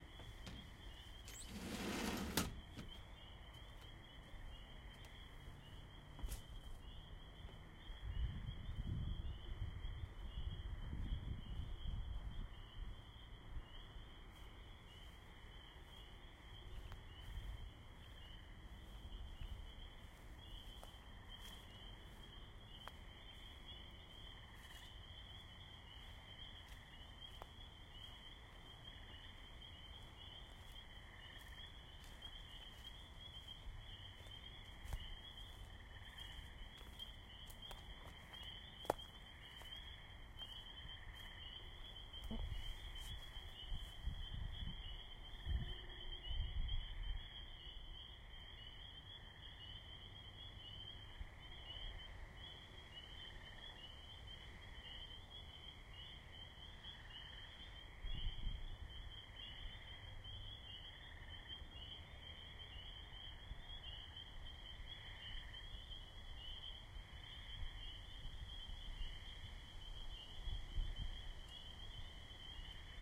frogs sliding screen twig snapping traffic
This is a binaural field recording I made in my backyard in May 2007 in Clarkston, Michigan, USA. I mostly wanted to record the sound of the frogs in the swamp behind the house and in the trees, but I also included the sound of the sliding screen door and the inevitable vehicle traffic noise in the background, mostly in the right ear (That's I-75). Also a few twig snapping noises included.